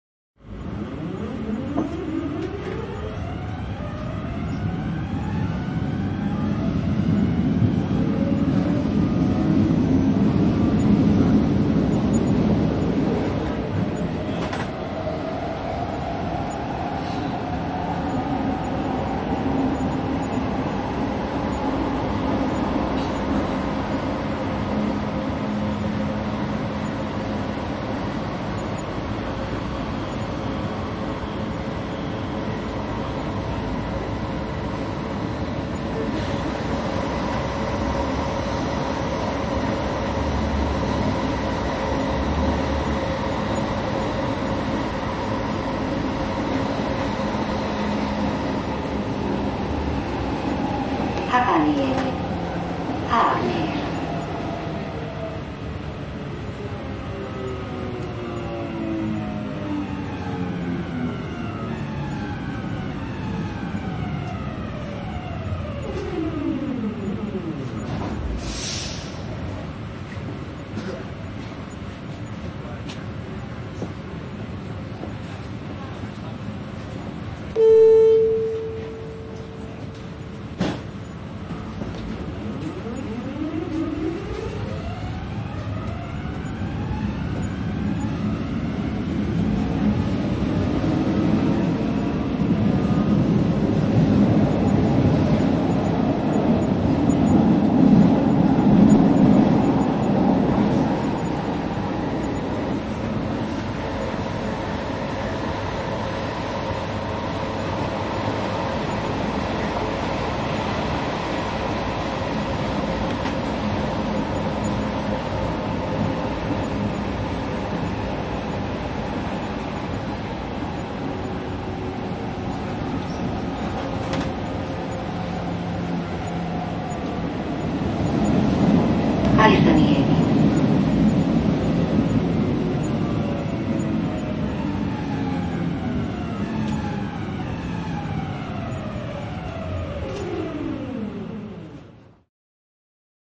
Metro, metrojuna, ajo, pysähdys / Metro, subway, in the train, running, announcement, stopping, doors, signal, blong, running, braking
Kulkua metrojunassa. Lähtö, kulkua, kuulutus, jarrutus, pysähdys, ovet, signaali, plong, lähtö, ajoa, hiljentää.
Paikka/Place: Suomi / Finland / Helsinki
Aika/Date: 01.11.2000
Ajo, Asema, Doors, Finland, Finnish-Broadcasting-Company, Metro, Metrojuna, Ovet, Run, Signaali, Signal, Soundfx, Station, Subway, Suomi, Train